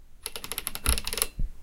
Dial Switch - 1
Switches being toggled and pressed in various ways
button, leaver, toggle, dial, controller, switch